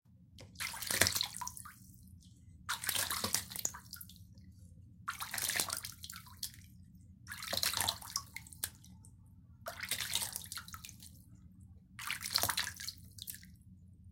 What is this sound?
Disappointed at the lack of water splashing sounds so here is one. It was created using a plastic tub and scooping handfuls of water and dropping them to create a large splash - drip effect
water, splashing, splash, drip